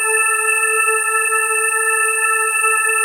Bight, Breathy Digital Organ made with Reason Subtractor Synths and Logic Drawbar Organ. 29 samples, in minor 3rds, looped in Redmatica Keymap's Penrose loop algorithm.